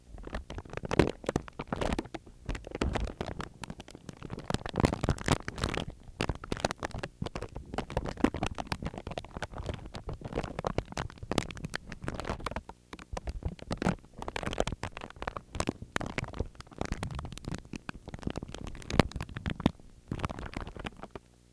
Crinkling a candy wrapper against a contact microphone.
crunch, crinkle, contact, static, wrapper